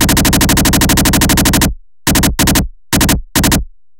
Automatic weapon firing
The sound of an automatic weapon being fired, perhaps suitable for a videogame. Created using the Willhelm Scream as source material and distorting the life out of it.
automatic, burst, effect, firing, game, gun, shooting, videogame, weapon